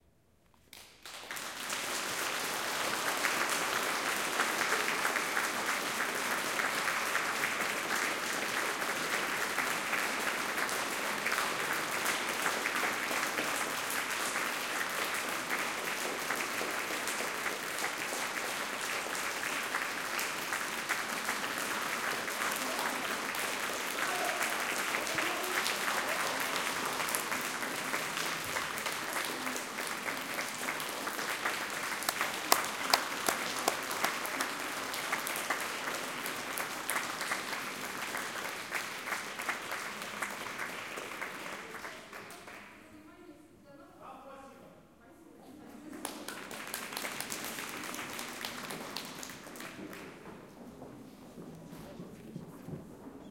applause organ hall
Audience about 60 people at chamber concert in organ hall of Penza philharmonia, Russia
applause, audience, cheering, philharmony